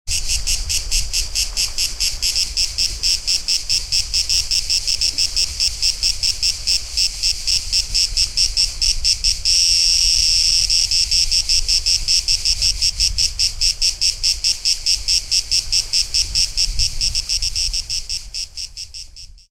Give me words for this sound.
Cicada solo close
A close recording of one cicada and quite some of its colleagues in the background :)
field-recording, ambient, cicada, animals, sea-side, summer, insects